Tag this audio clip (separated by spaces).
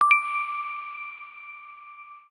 coin diamond game item note object pick-up